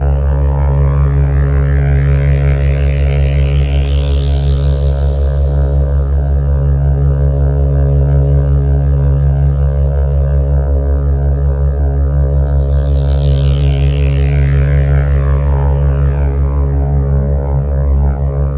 Weird FX Loop :: High Planes Hunger Lonely Bass Loop
Atmospheric, lonely high planes feel... far away from the everyday buzz...